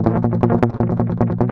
cln muted A# guitar
Clean unprocessed recording of muted strumming on power chord A#. On a les paul set to bridge pickup in drop D tuneing.
Recorded with Edirol DA2496 with Hi-z input.
160bpm, a, clean, drop-d, guitar, les-paul, loop, muted, power-chord, strumming